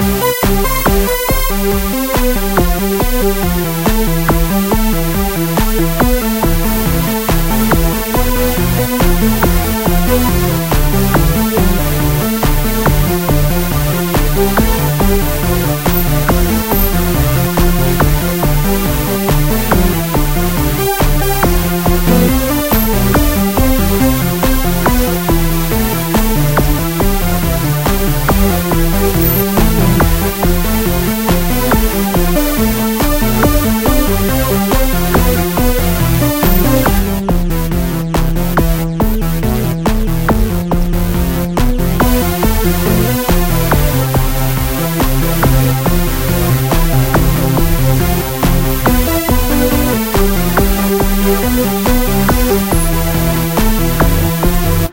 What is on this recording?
loop1 for song
long loop i mad in fl studio
fl, loops, studio, techno